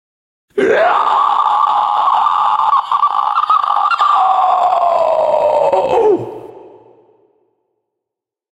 A short Inhale screech